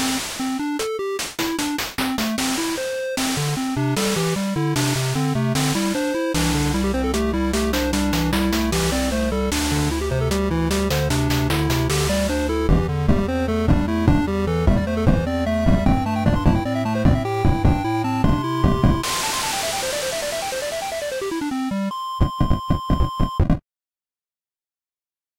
Pixel Song #14
Another piece of music. This time, I tried to actually have an ending, of sorts. Most of the time, I seem to create build up, or try to. But I haven't really focused on making an ending. Anyways, this is completely free, which means you can use it for any projects, or things that fit into that category.
short, pixel